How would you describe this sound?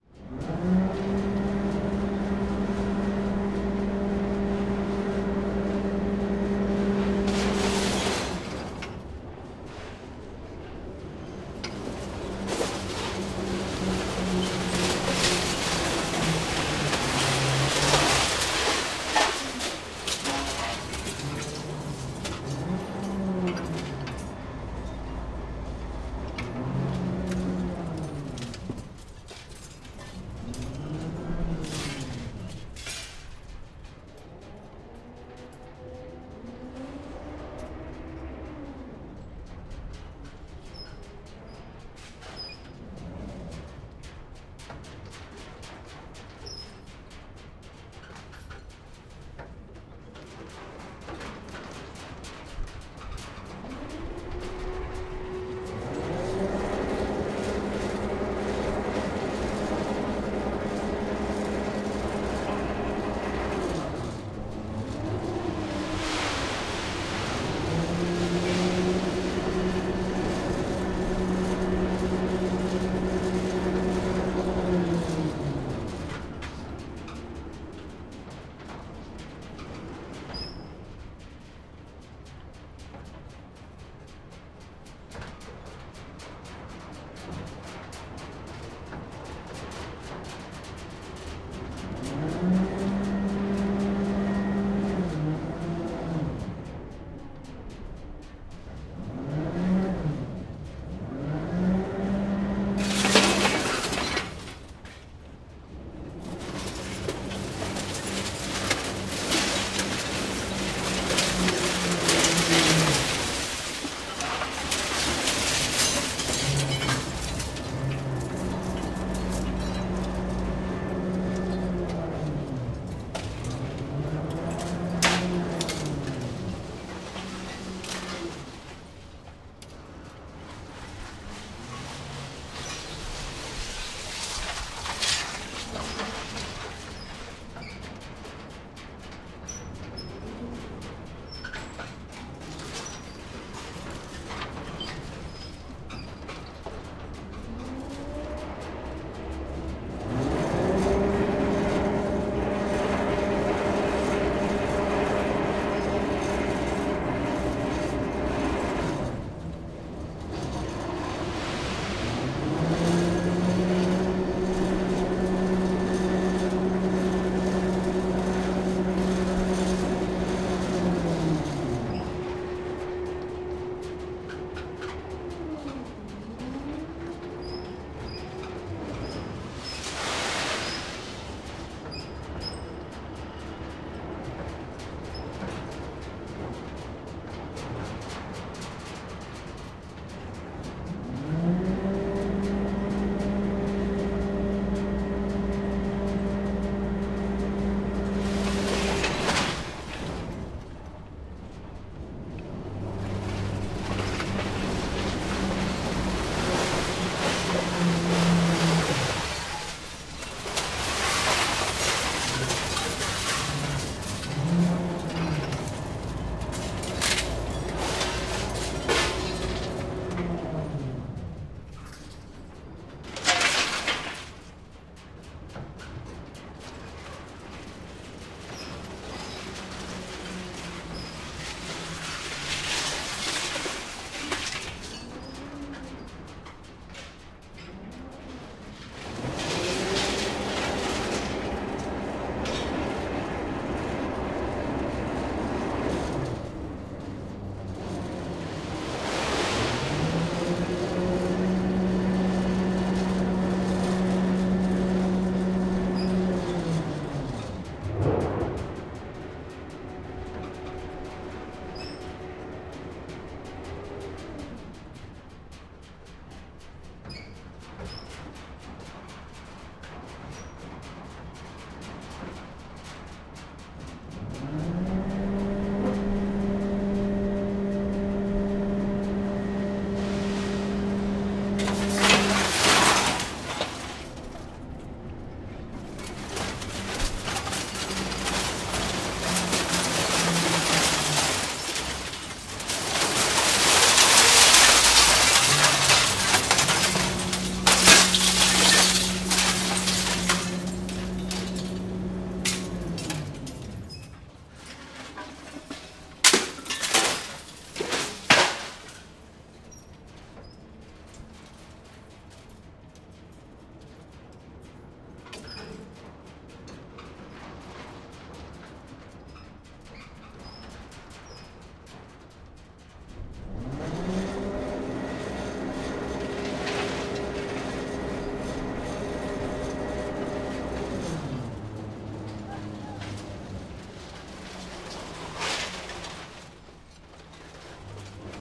Mono recording of a crane collecting the metal waste and loading it onto a ship. Recorded with DPA-4017 -> Sonosax SX-R4.
rijeka, sonosax-sx-r4